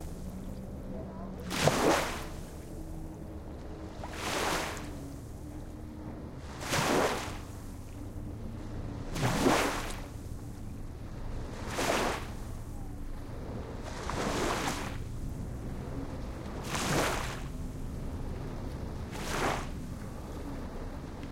Water wave Beach Field-recording
Recorded Tascam DR-05X
Edited: Adobe + FXs + Mastered